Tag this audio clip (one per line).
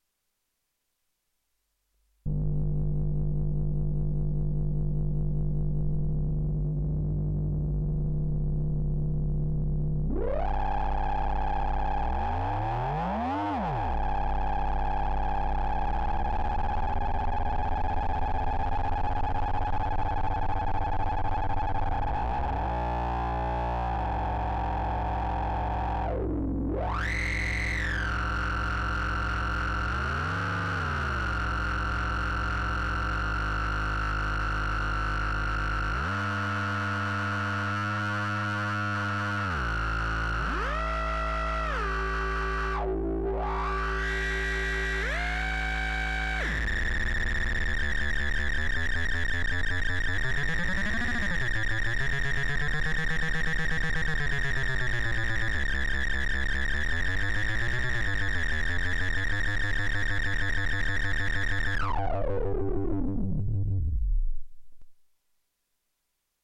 monotron
korg
drone
synth
analogue
analog